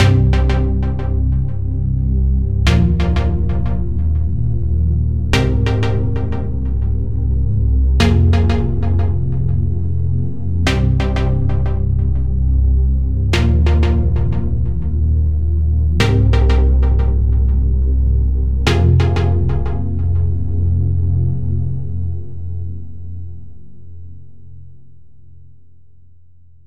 Chord Pluck #1
Epic Trance pluck chord in Serum.
Music, EDM, Dance